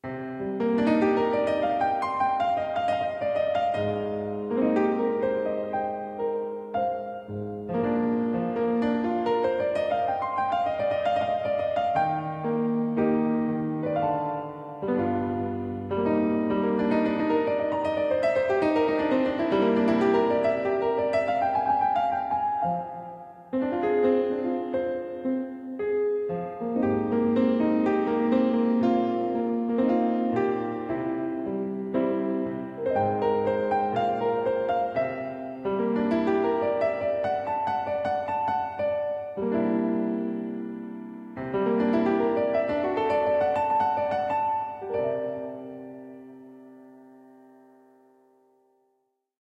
Over the city Piano theme
improvisation, theme, film, movie, melancholic, cminor, piano, cinematic